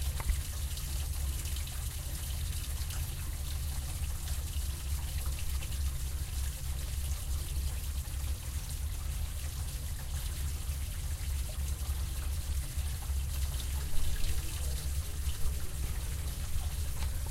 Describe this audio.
Using a zoom h4 recorder, I listened to the plumbing from within one of the buildings in my university.